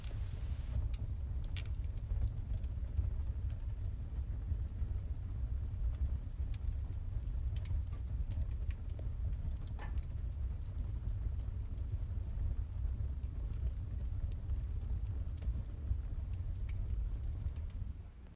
A fire crackling in a braai